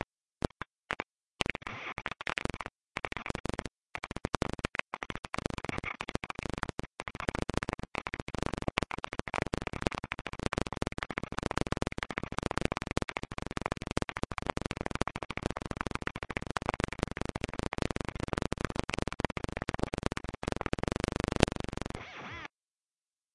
Watch your volume: lots of clicks and pops!
Not sure how the original clicks and pops were created - I think it was by feeding sounds to a series of gates. One of the gates was reversed and basically the two gates were only open together for a very brief time, resulting in the chopped up, clicking and poping sound.
These sounds were part of the experiments with Amps I was doing at the time, so and amp model 6505 or 6505+ (freom Revalver III) would have been part of the signal chain... somewhere...